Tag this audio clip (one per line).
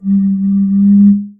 one-shot
blowing
33cl
air
sample-pack
resonance
closed-end
building-block
samples
tone
glass
blown
bottle